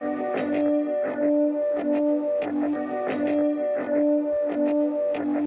Guitar chord randomly quantize
guitar, 120, loop, gtr, bpm